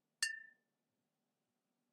Me flicking a glass bottle with my finger.